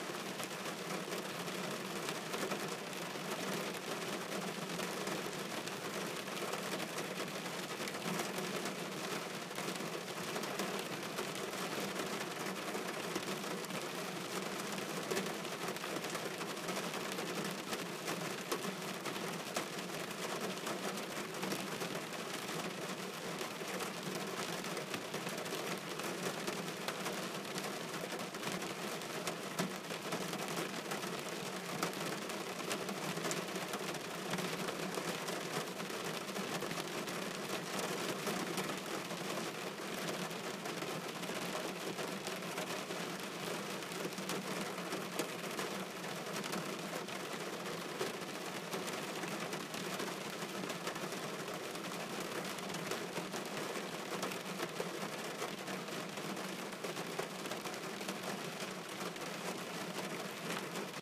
rain on a car roof
rain, car, field-recording